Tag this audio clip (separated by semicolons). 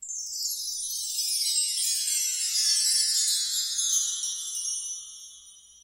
chimes; glissando; orchestral; percussion; wind-chimes; windchimes